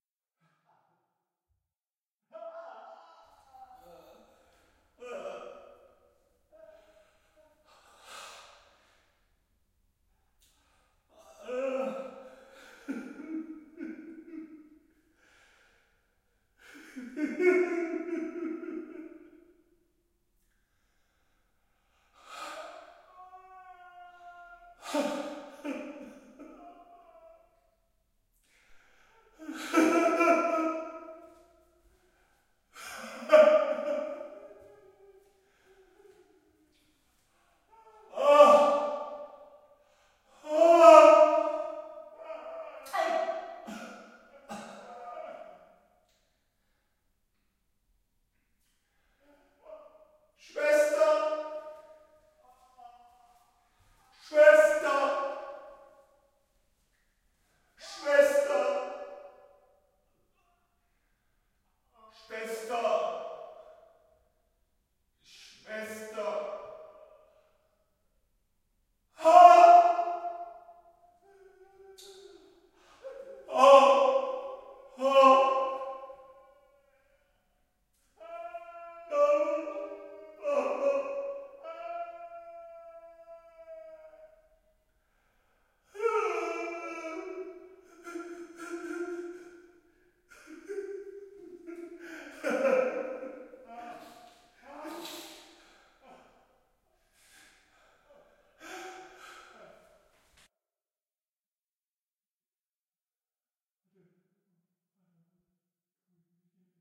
Kombi Pain Mix
Needed different sounds of men crying in pain. So recorded a set of different noises, grunts and crys. Made some fast mixes - but you can take all the originals and do your own creative combination. But for the stressed and lazy ones - you can use the fast mixes :-) I just cleaned them up. Si hopefully you find the right little drama of pain for your project here.
ache, ambulance, cry, hospital, madhouse, men, pain, war, whimpering